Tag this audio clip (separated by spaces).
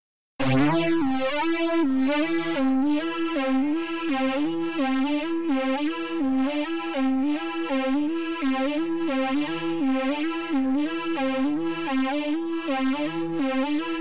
electronica riff soundscape synth